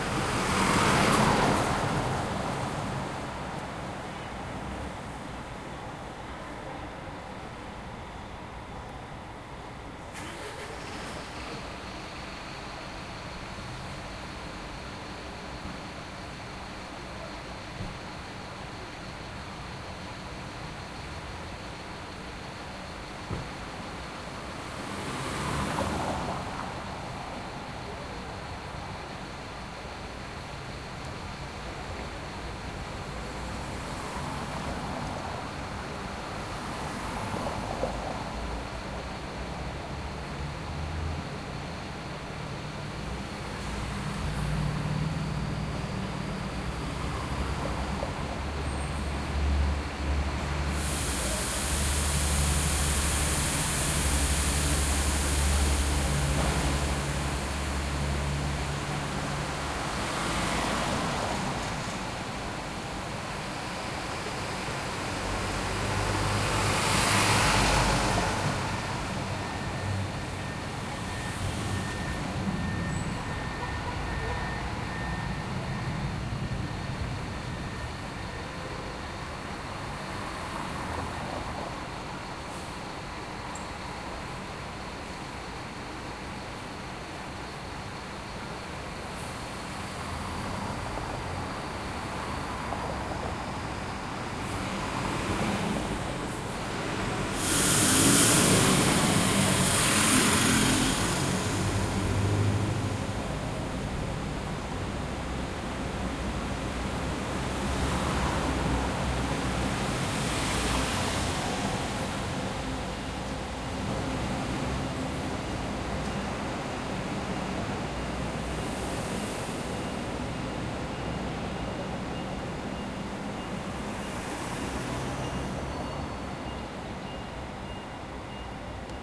Bus leaving and passing cars
bus, car, pass-by, vehicle